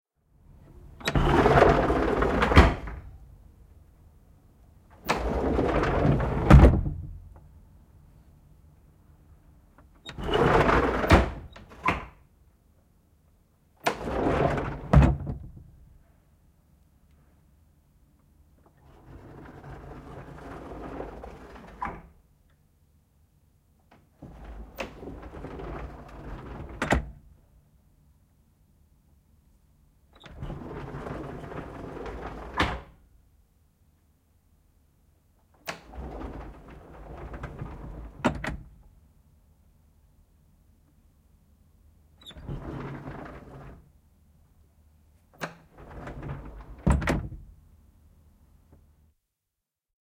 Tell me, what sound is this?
Liukuovi, laiva / Sliding door, wooden, on a bridge of a small ship, open and close, various
Puinen liukuovi pienen laivan komentosillalla, auki ja kiinni. Erilaisia.
Paikka/Place: Suomi / Finland / Hamina
Aika/Date: 01.10.1984